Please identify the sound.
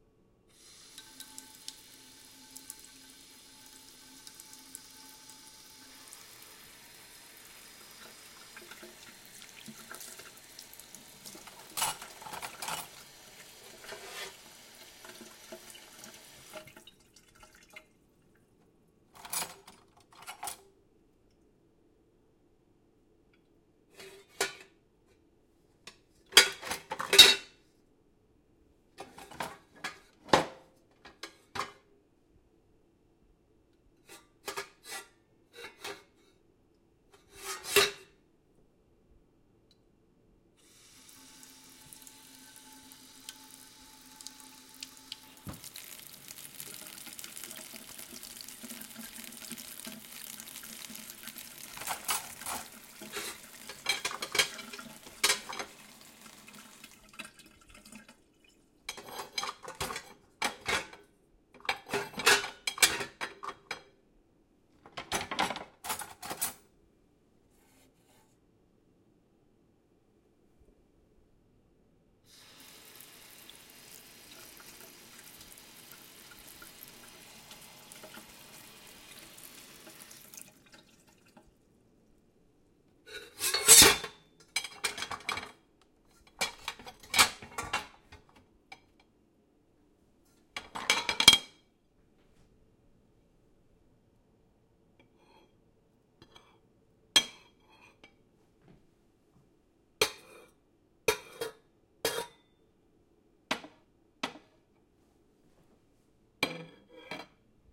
Kitchen.Dishes.Sink.Fussing
Me fussing in my friend's kitchen. Plates being scraped, stacked and clinking at close proximity. Includes Cutlery and glasses as well. Water running lightly at first, then off, then with more pressure. Recorded on a Zoom h4.
cutlery-clinking, Washing-dishes